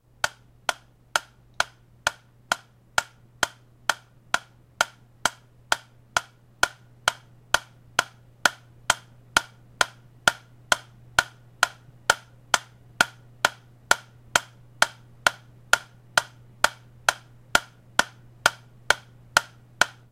A real metronome!
metronome, sound